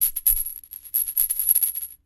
perc-peals-glassbottle
Peals glass bottle sounds, recorded at audio technica 2035. The sound was little bit postprocessed.
light, perc, percs, shacker